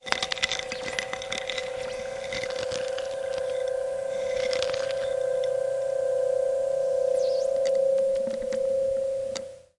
Feedback rugoso con cereales en plato
grain, granulated